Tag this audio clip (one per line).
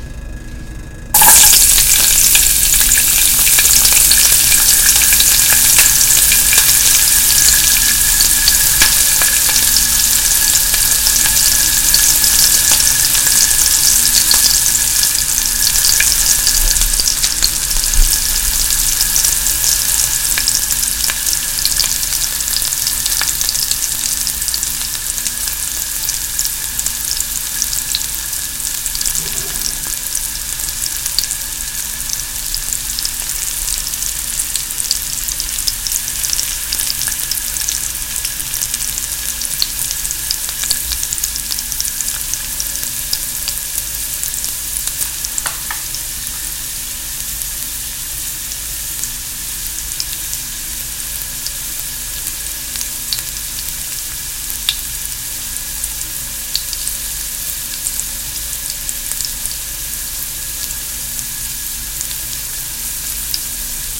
ambience
kitchen
indoor
frying
cooking